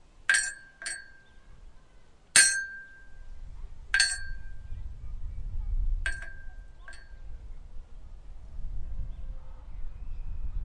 OWI Metal clanging V2
Some metal parts from a jungle gym.
tining, squeaking, clanging, moving